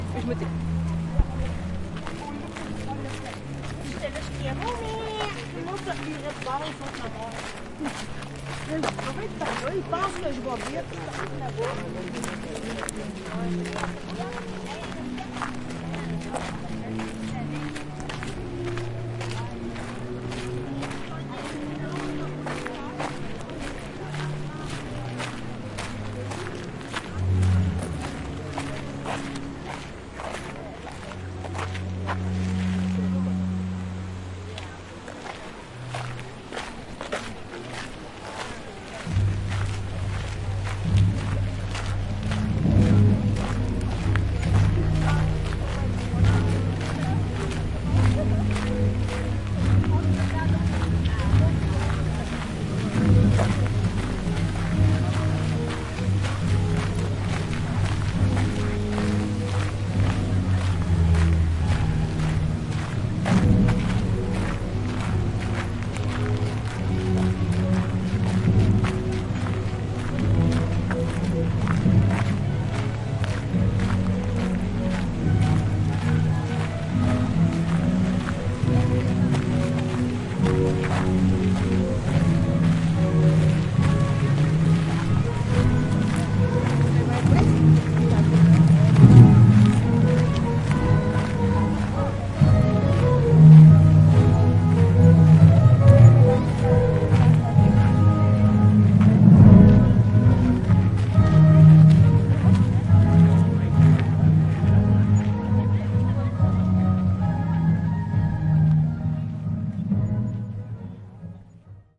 Versailles - Entrée dans le jardin
Recorded during Musical Fountains Show at Versailles palace (by night).
Entering the garden. Music playing in background.